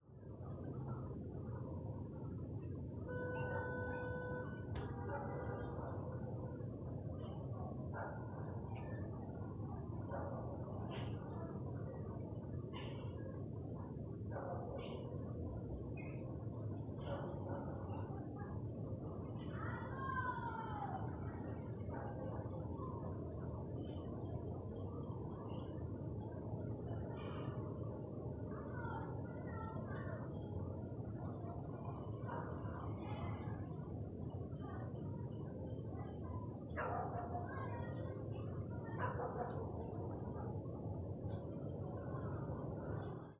Exterior Night Environment
Environment, Exterior, Night